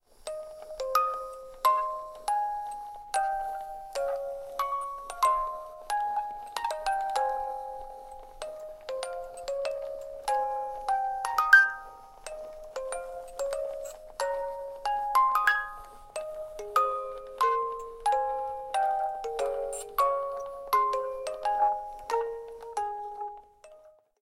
Raw audio of a manual mechanical music box with hole-punched sheet music for "Happy Birthday".
An example of how you might credit is by putting this in the description/credits:
The sound was recorded using a "H1 Zoom recorder" on 22nd November 2016.